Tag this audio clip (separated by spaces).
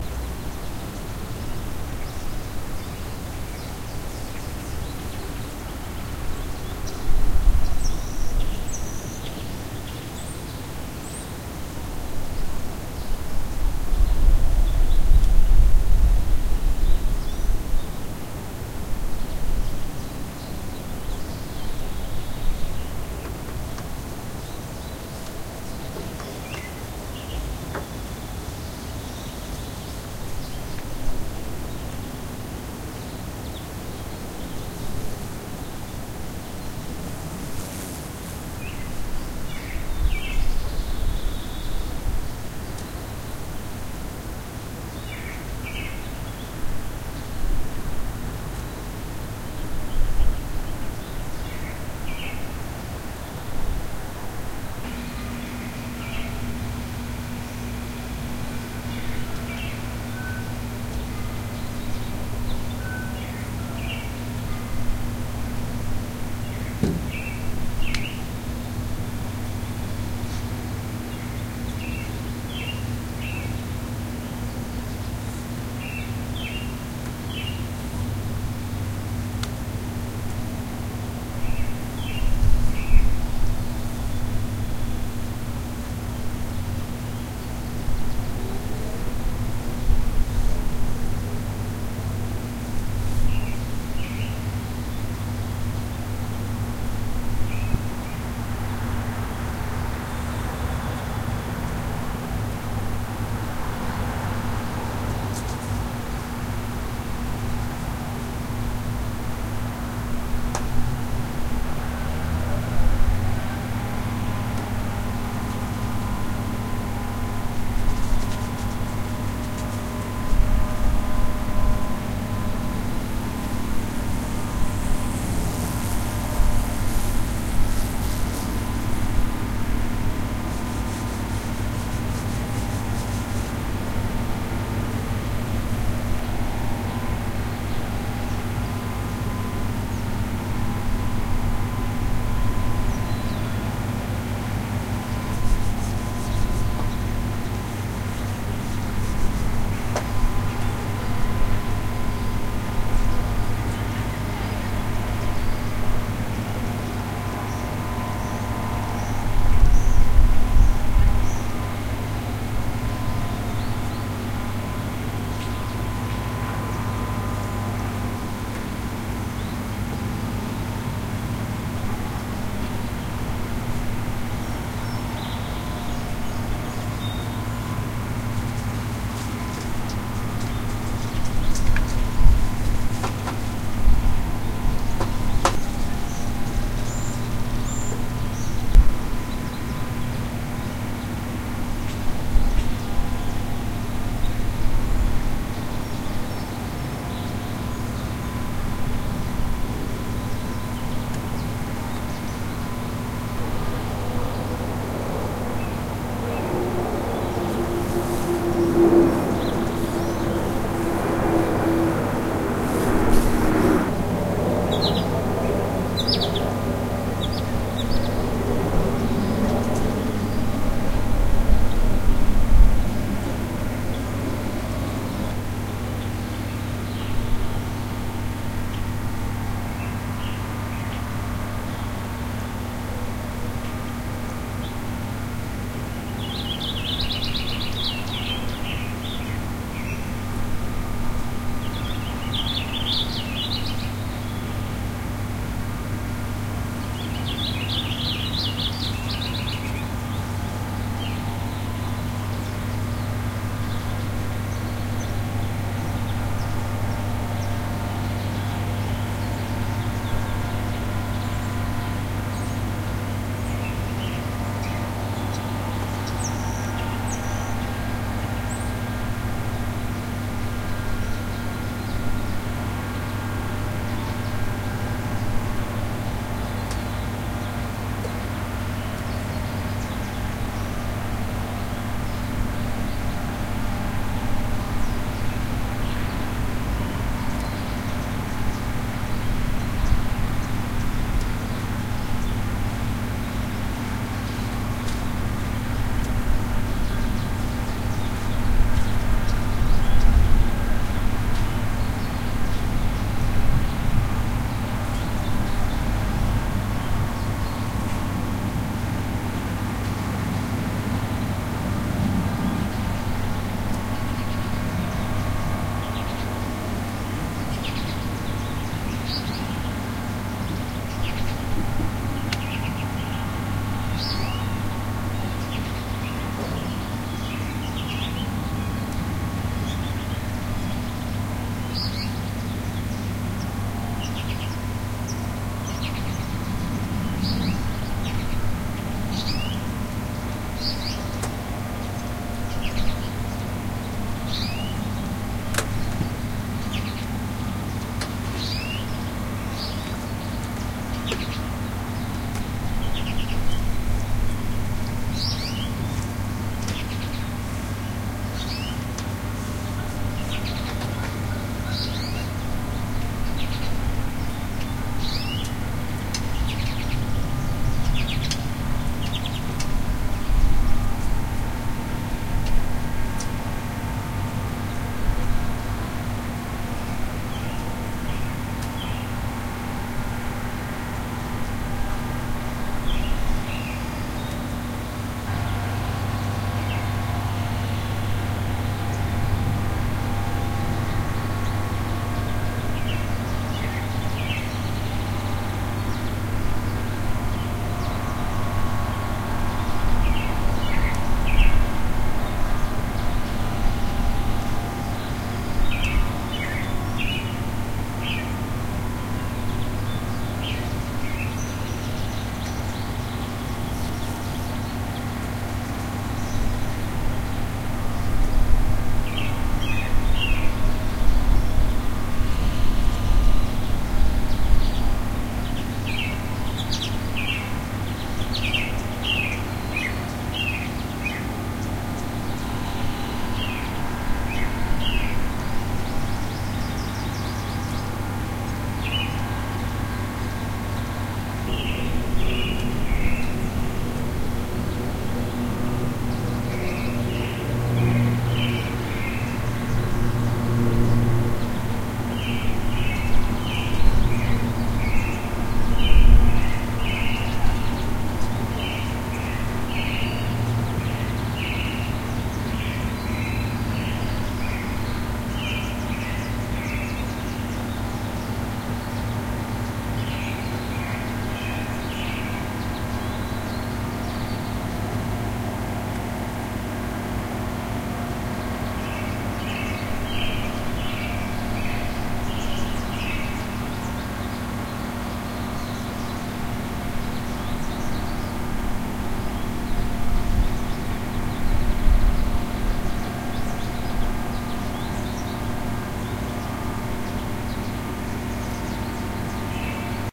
ambient back backyard neighborhood yard